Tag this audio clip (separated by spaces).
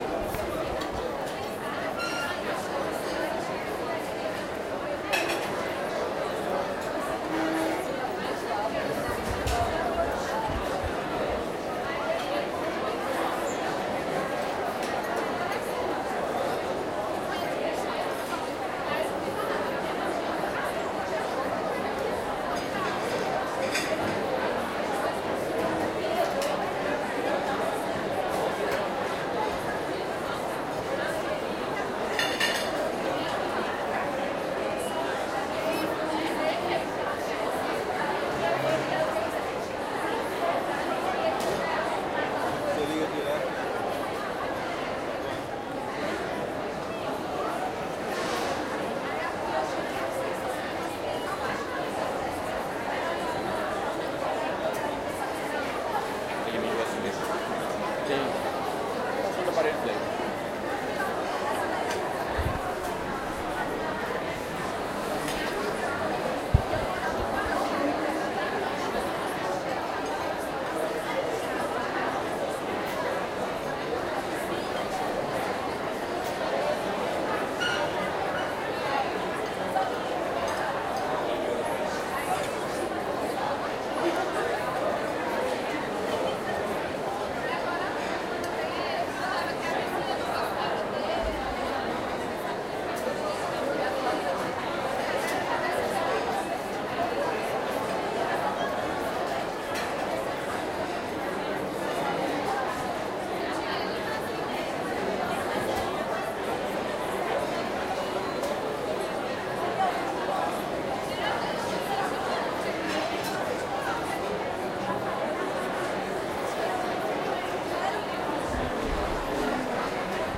lunch people-talking field-recording